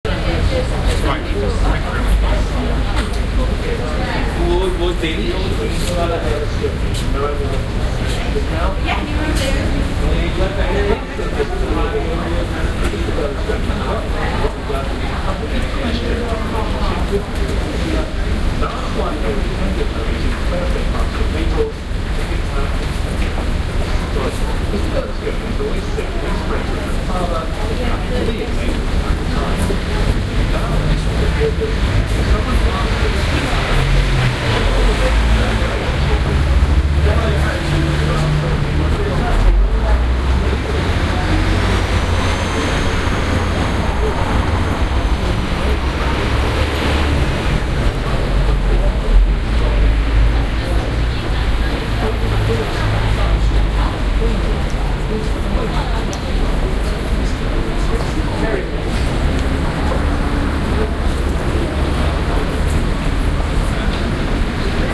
Barbican - Evening Standard seller with radio by station
ambient, ambiance, atmosphere, background-sound, field-recording, general-noise, soundscape, city, london, ambience